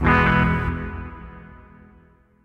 Undistorted electric guitar with quite a bit of reverb